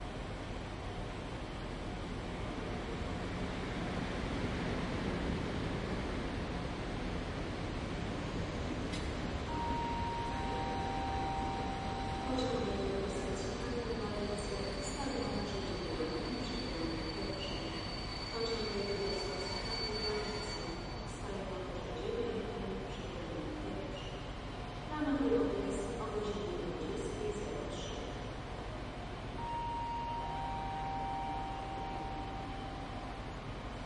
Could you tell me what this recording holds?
railway station 6
Krakow railway station ambience